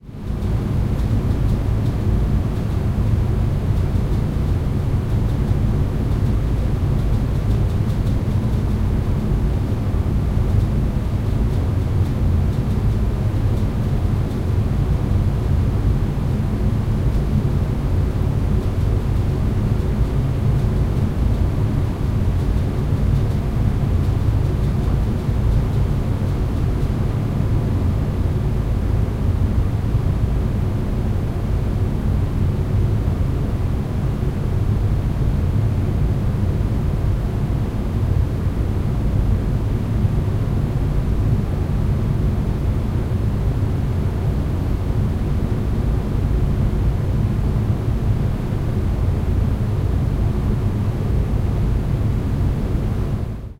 Roomtone Hallway upstairs Spinnerij Rear
Rear recording of surround room tone recording.
roomtone, sounddesign